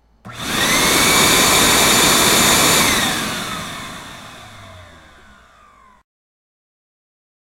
Shop vac audio.